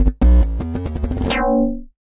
A musical gesture made from synthesised sounds.